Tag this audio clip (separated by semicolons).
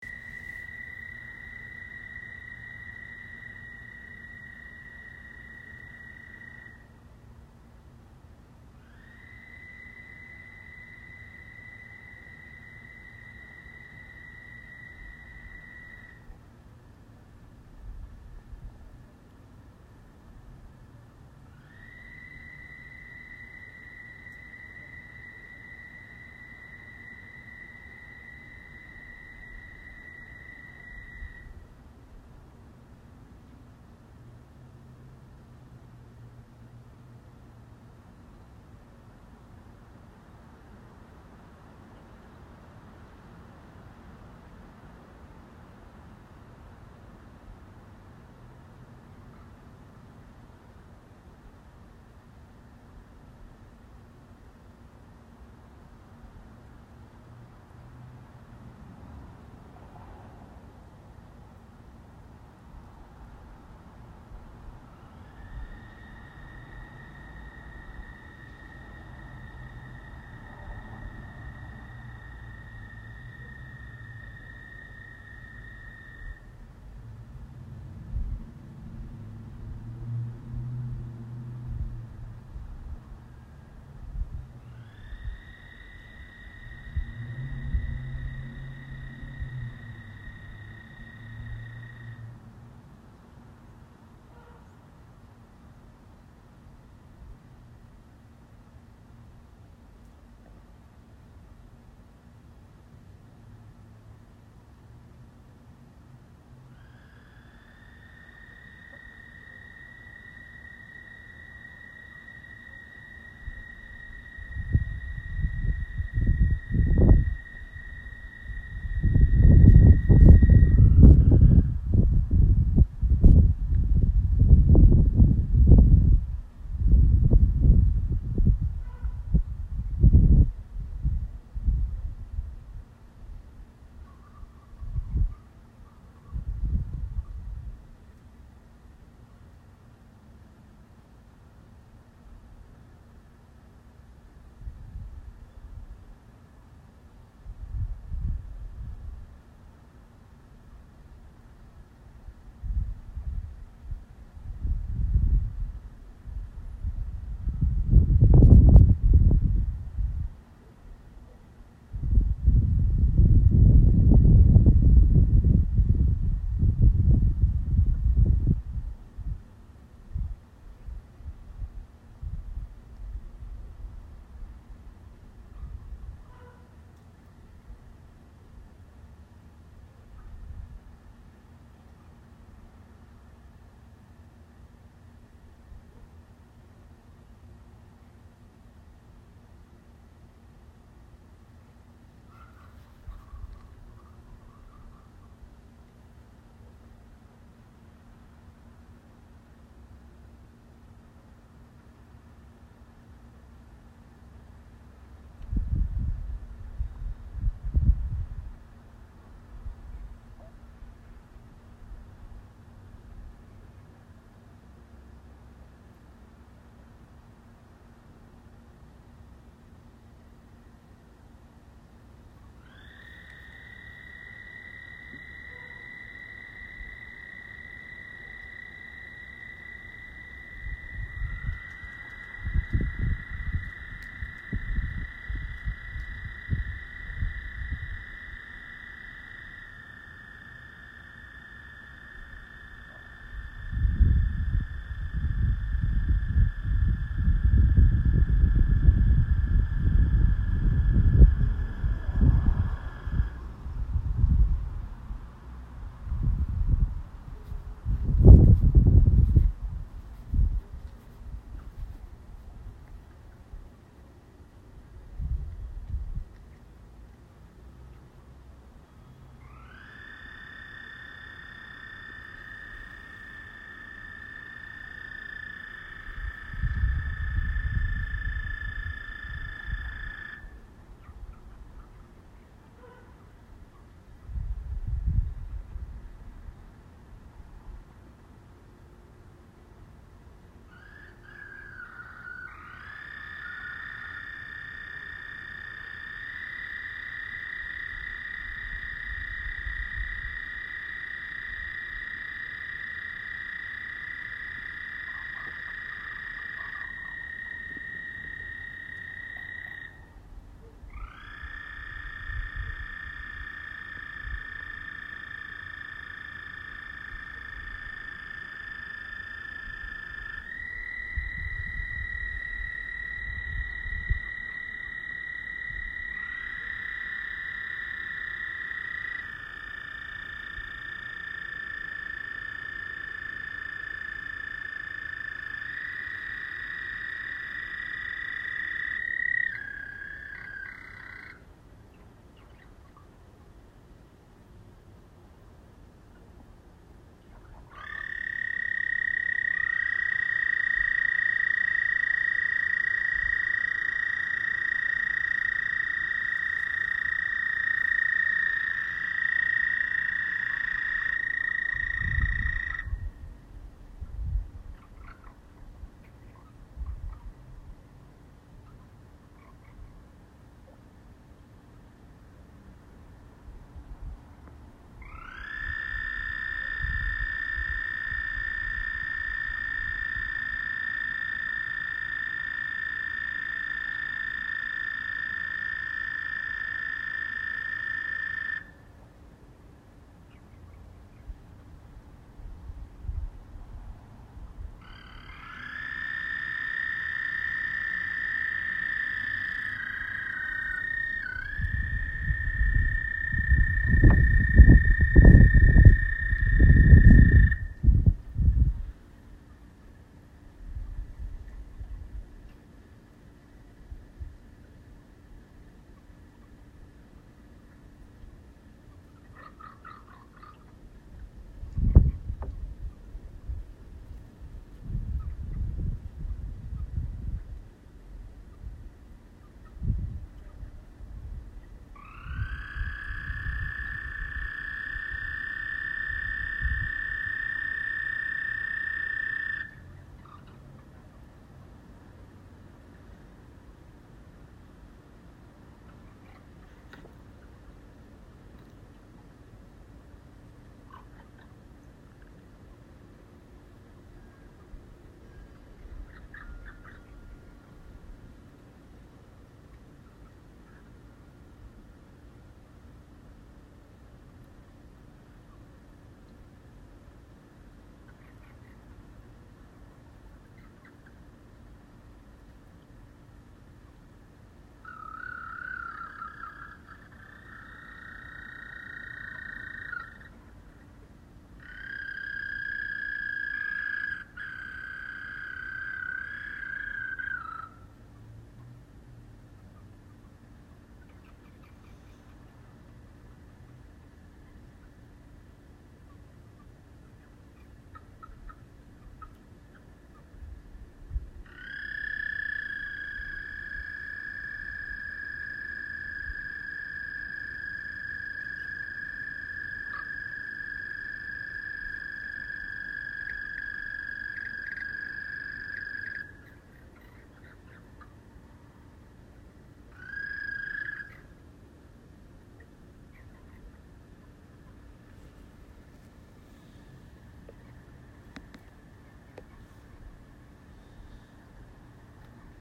jungle; marsh; pond; swamp; toads